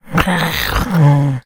A voice sound effect useful for smaller, mostly evil, creatures in all kind of games.
arcade, creature, fantasy, game, gamedev, gamedeveloping, games, gaming, goblin, imp, indiedev, indiegamedev, kobold, minion, RPG, sfx, small-creature, Speak, Talk, videogame, videogames, vocal, voice, Voices